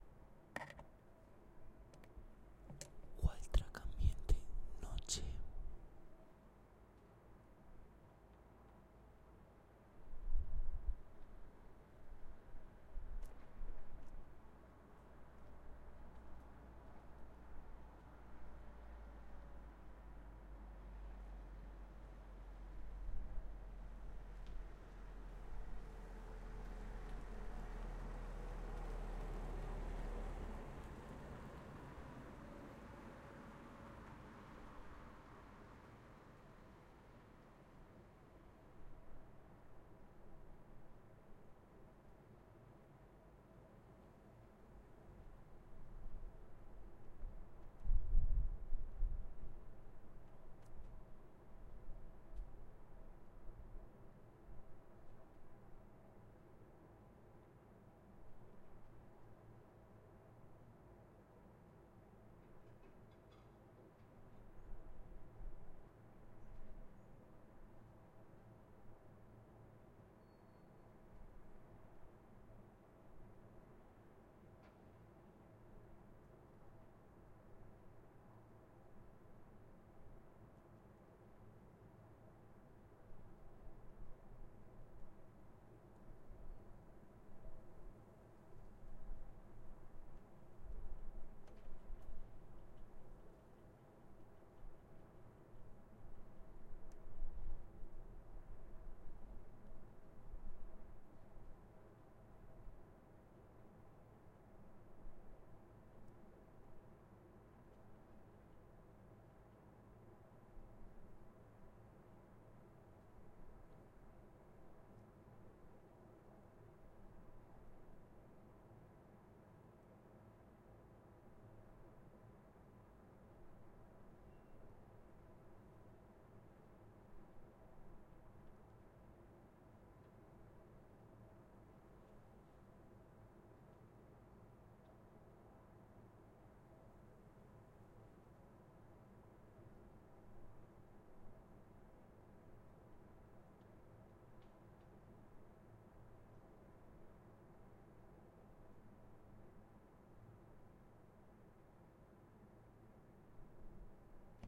MADRID AT NIGHT
Wildtrack recorded during the quarantine of the Coronavirus.
coronavirus, field-recording, Madrid, night, wildtrack